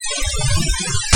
Rapid digital beeps
beeps, digital